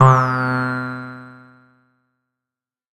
Blip Random: C2 note, random short blip sounds from Synplant. Sampled into Ableton as atonal as possible with a bit of effects, compression using PSP Compressor2 and PSP Warmer. Random seeds in Synplant, and very little other effects used. Crazy sounds is what I do.
110, acid, blip, bounce, bpm, club, dance, dark, effect, electro, electronic, glitch, glitch-hop, hardcore, house, lead, noise, porn-core, processed, random, rave, resonance, sci-fi, sound, synth, synthesizer, techno, trance